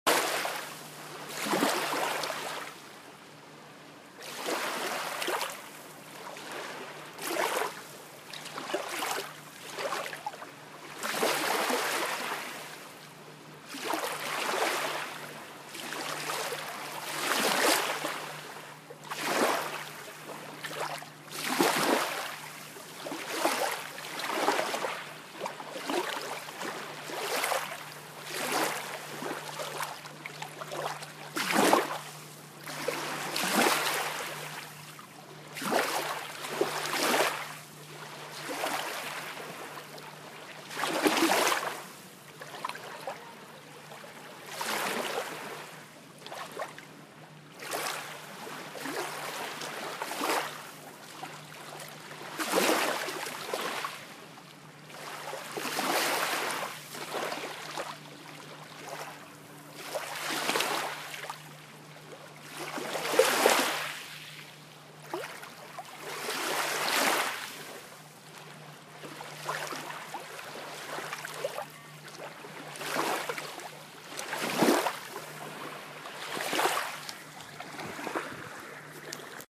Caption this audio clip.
Waves on a gravel lakeshore - recorded at the Bodensee (Lake Constance)
Small regular waves falling on the gravel lakeshore of the Bodensee (Lake Constance), which is the largest lake in Germany. This lake is so large that - as you can hear - it has some 'real waves', which sound very relaxing :)
Recorded on an iPhone 7, Aug. 2017.